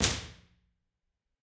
Studio B Left
Impulse response of Studio B at Middle Tennessee State University. There are 4 impulses of this room in this pack, with various microphone positions for alternate directional cues.